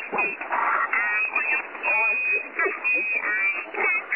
distorted voice 15
Distorted voice on shortwave with high tone every time after he says a word. Recorded with Twente university's online radio receiver.
noise, male, static, distorted, interference, high, tone, radio, shortwave